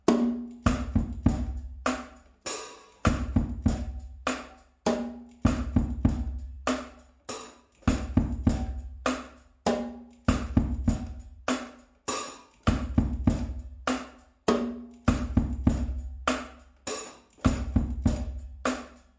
confinement-groove-02.2
Drum pattern from Jamie Woon's song "Message" (2015), with some variations.
Recorded by me on a Millenium Youngster kids drum-kit, using a small omni lapel microphone just above the instrument, in a medium quite empty room.
Minimal processing was added: just corrective EQ, and light compression.
beat, groovy, loop, rhythm, toy-drums